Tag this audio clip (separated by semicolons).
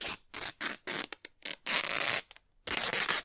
cloth; clothing; destroy; fabric; ripped; ripping; tear; tearing; tore